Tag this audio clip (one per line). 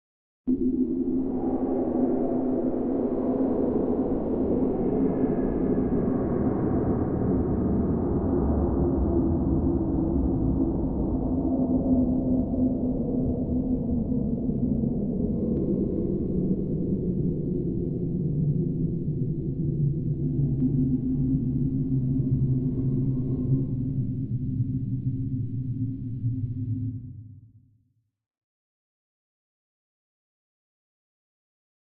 off
future
space
over
weird
vacuum
soundeffect
sound-effect
sci-fi
end
woosh
strange
abstract
down
drop
electronic